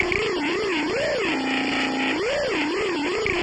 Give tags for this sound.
Electronic
Alien
Noise
Machines

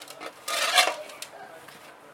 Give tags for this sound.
concrete
field
foley
recordin
shovel

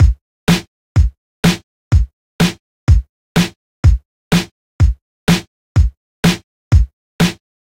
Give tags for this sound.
straight
beat
loop

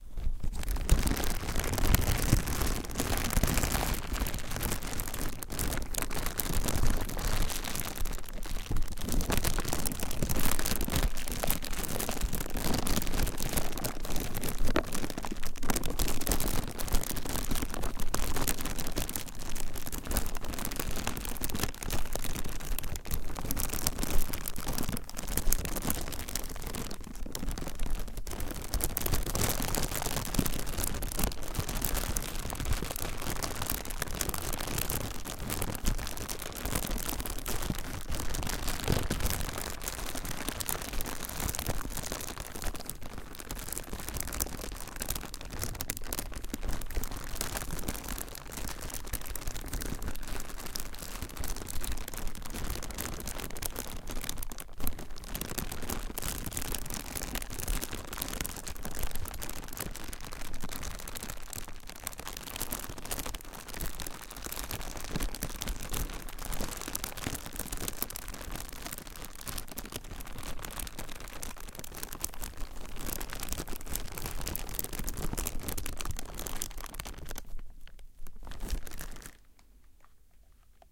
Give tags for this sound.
asmr
bag
crinkle
crumple
crush
plastic
plastic-bag
stereo

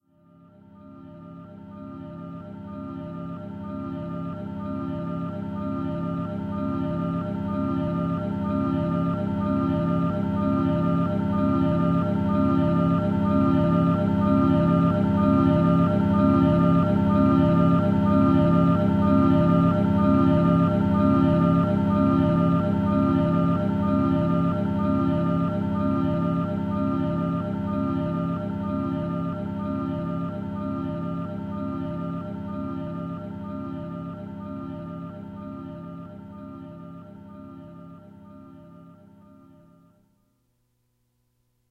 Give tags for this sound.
loop
music
notes
swell

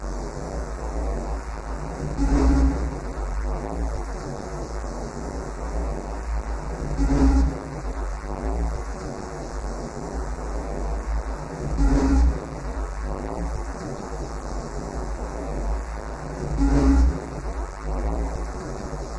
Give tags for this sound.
noise
bass

